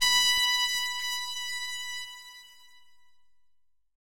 120 Concerta trumpet 04
layer of trumpet
free, loop